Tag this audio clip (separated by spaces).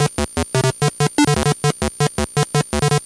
lsdj sounds little kitchen